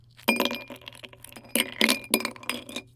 Ice Cube1
These are various subtle drink mixing sounds including bottle clinking, swirling a drink, pouring a drink into a whiskey glass, ice cubes dropping into a glass. AT MKE 600 into a Zoom H6n. No edits, EQ, compression etc. There is some low-mid industrial noise somewhere around 300hz. Purists might want to high-pass that out.
cocktail, bar, ice, glass, alcohol, drink